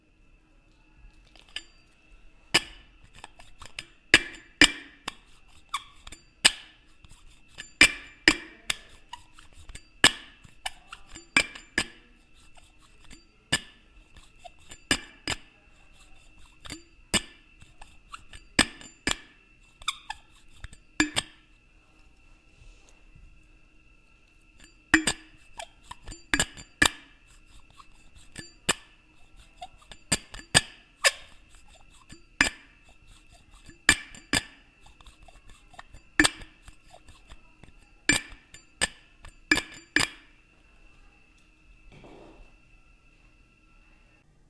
A rhythmic pattern produce by a bottle and lovely accompanist, bottle top.